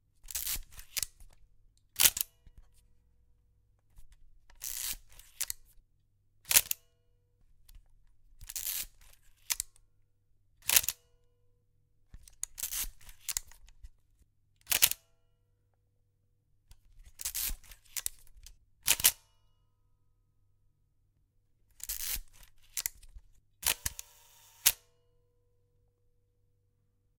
Cocking shutter and then taking a picture with various shutter speeds in this order:
1/1000, 1/500, 1/60 1/15, 1/8, 1/1 sec
Recorded with Rode NT1-A microphone on a Zoom H5 recorder.